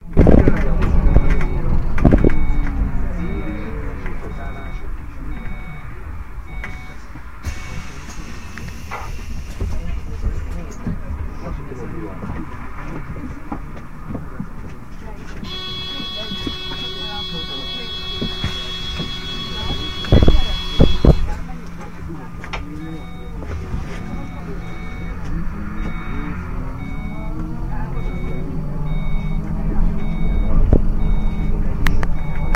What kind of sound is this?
Recorded on bus 70 at Király street, Budapest.